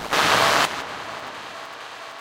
noisy drone sounds based on fieldrecordings, nice to layer with deep basses for dubstep sounds
drones, dub, experimental, fieldrecording, noise, reaktor, sounddesign